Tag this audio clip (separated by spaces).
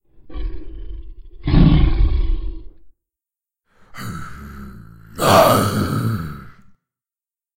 growl beasts roar beast creature snarl growls scary zombie horror monster